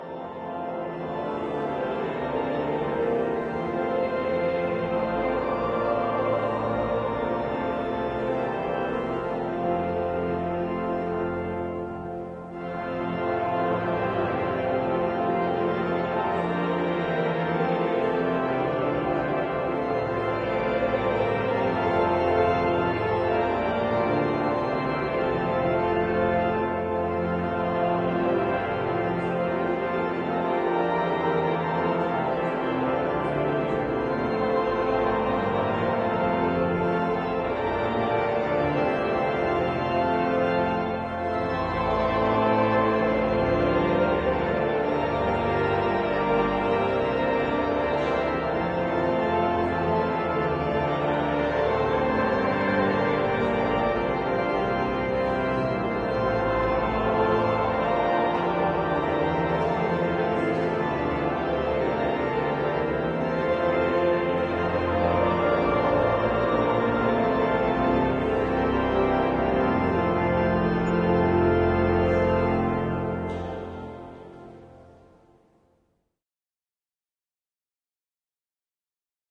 Recording of a part of a sermon in Canterbury cathedral with choir and organ, traditional hymn. It is made in the part of the church that is open for visitors. Because of the distance you get a lot of reverberation.
canterbury, cathedral, choir, church, congregation, hymn, large, organ, space